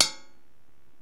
crash 2 bell 2
This is a crash from another 14" cymbal that was bent to hell but sounds really cool live.
almost like a trash can lol.
This one was hit while i held the cymbal
crash, cymbal, loop, rock, bell, live, loops, techno